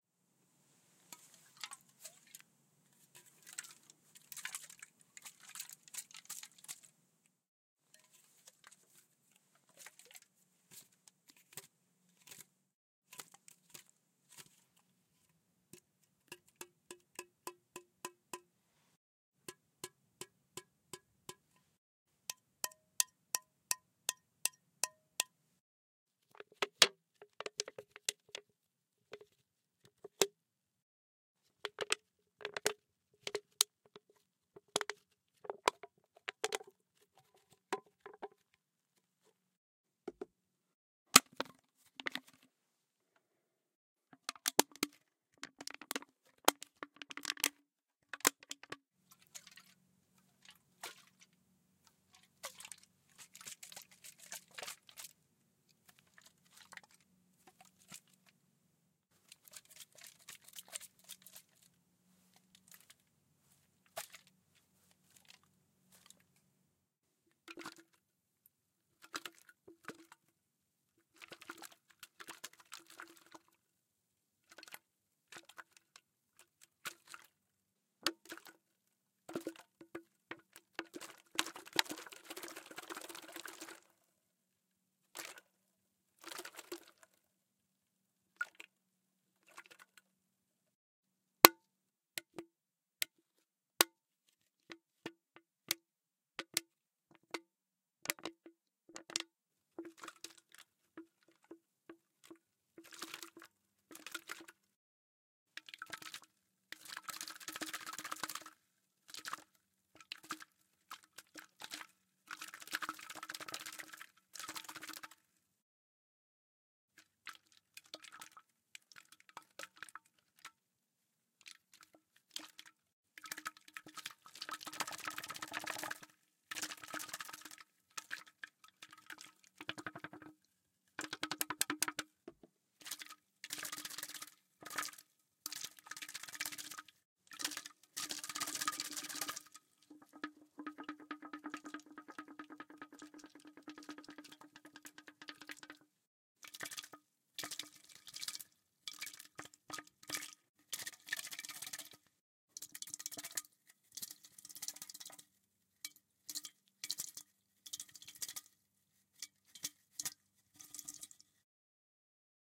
Recorded two can / tins with beer